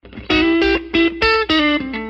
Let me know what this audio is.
blues lick in A #2
another little guitar blues lick
guitar, blues